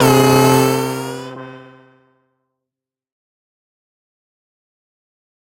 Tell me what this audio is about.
Blip Random: C2 note, random short blip sounds from Synplant. Sampled into Ableton as atonal as possible with a bit of effects, compression using PSP Compressor2 and PSP Warmer. Random seeds in Synplant, and very little other effects used. Crazy sounds is what I do.
110, acid, blip, bounce, bpm, club, dance, dark, effect, electro, electronic, glitch, glitch-hop, hardcore, house, lead, noise, porn-core, processed, random, rave, resonance, sci-fi, sound, synth, synthesizer, techno, trance